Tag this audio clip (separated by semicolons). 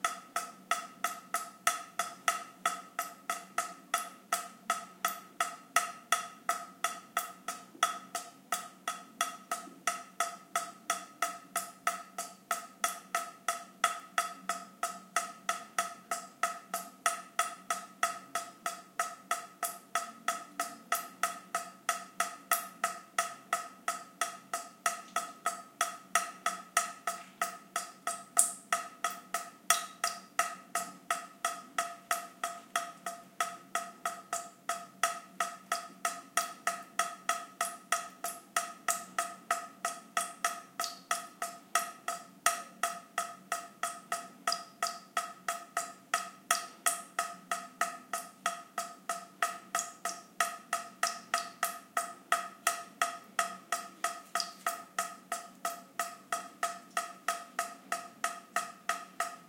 dampness; dripping; faucet; leak; rain; tap; water